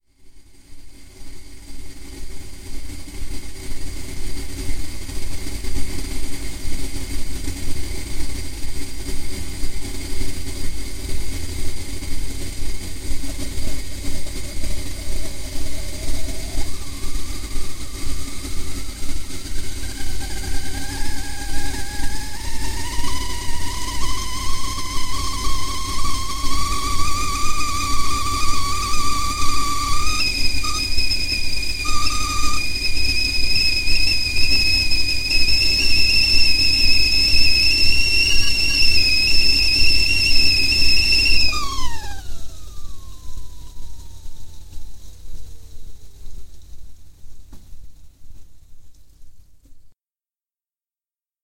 I was making sound fx for a comedy sketch and wanted a whistling kettle.I'd just bought one for my own kitchen so here it is!.Coming to the boil, whistling and then quietening down as the gas is switched off.
cooking, kettle, boiling, kitchen